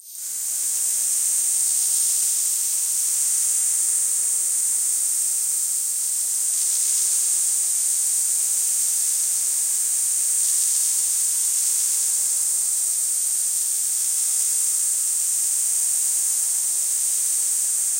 A harsh noisy drone resembling bats in a cave... All sounds were synthesized from scratch.